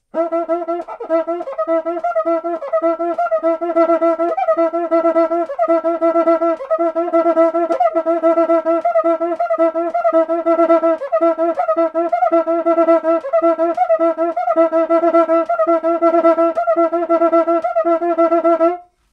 Different examples of a samba batucada instrument, making typical sqeaking sounds. Marantz PMD 671, OKM binaural or Vivanco EM35.
brazil, drum, groove, pattern, percussion, rhythm, samba